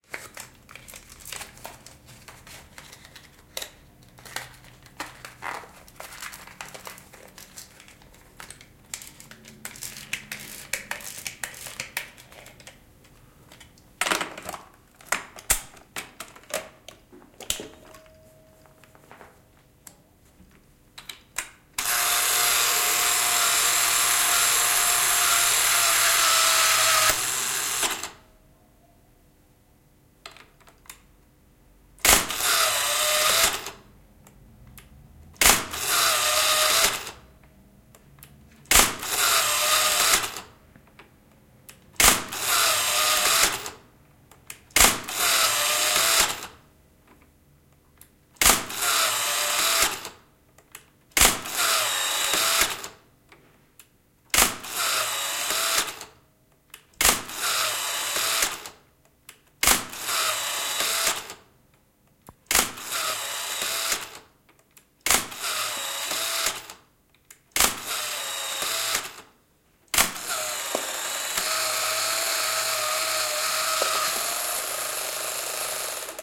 Kamera, moottoroitu, valokuvakamera / Photo camera, motorized, analog, film load up, automatic wind, single shots, shutter, rewind, a close sound, interior (Mamiya 645)
Filmin lataus, automaattinen kelaus, laukauksia, suljin, kelaus takaisin. Lähiääni. Sisä. (Mamiya 645).
Paikka/Place: Suomi / Finland / Vihti
Aika/Date: 11.06.1981